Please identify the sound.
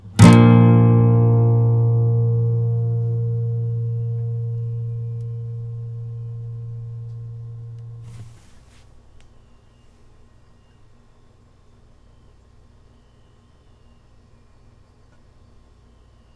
yamaha Bb
More chords recorded with Behringer B1 mic through UBBO2 in my noisy "dining room". File name indicates pitch and chord.
acoustic, chord, guitar, major, multisample, yamaha